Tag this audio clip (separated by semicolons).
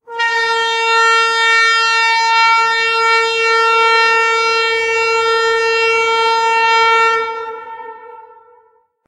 press; airhorn; siren; fan; gas; alarm; gashorn; sports; signal; annoy; air-horn; annoying; horn; powered